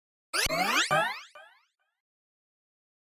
I used FL Studio 11 to create this effect, I filter the sound with Gross Beat plugins.
digital, sound-design, sound-effect, game, computer